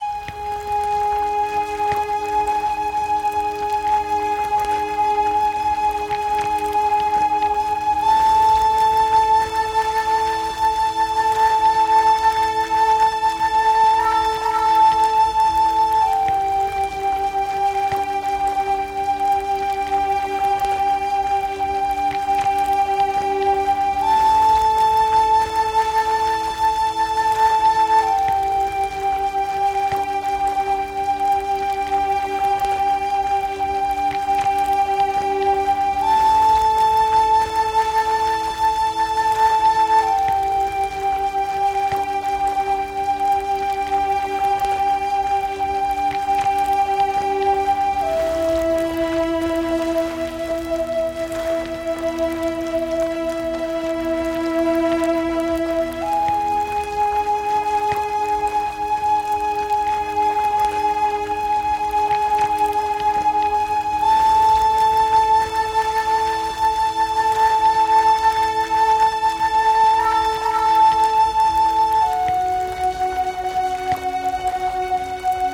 Ethno Folk Native-American Flute Atmosphere Atmo Amb Sad Meditative Mood Cinematic Surround
Amb, Ambient, Atmo, Atmosphere, Chill, Cinematic, Dark, Drone, Ethno, Film, Flute, Folk, Meditative, Mood, Movie, Native-American, Relax, Sad, Spa, Surround